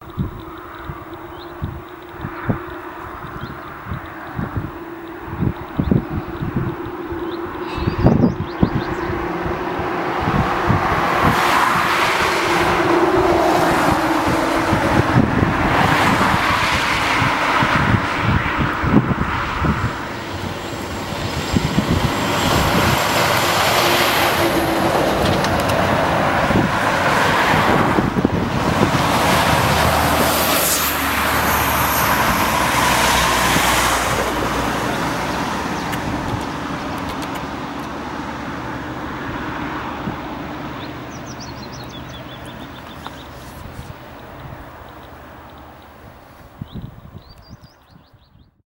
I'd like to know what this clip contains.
Country Lane Passing Traffic with mild wind
Foley audio of passing cars on a country lane. Cars passed behind the direction the camera was facing.
There's a mild stabilisation noise you may hear which could detriment your audio if not removed. Other than this, the sound is eloquent enough. OIn camera mic has been used from a Canon 7d with no wind filter. Recorded in Burnley, Lancashire
burnley, bus, car, cars, country, fast, field-recording, lancashire, lane, left, mic, noise, on-camera, passing, right, road, sound, speeding, stabiliser, street, surround, traffic, truck, Wind